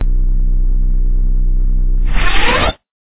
light saber idle and extinguish.
Made using mic scrape on desk, human voice, and digitally generated/manipulated hum.